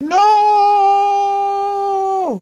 nooo with formant shift 2
request, long-no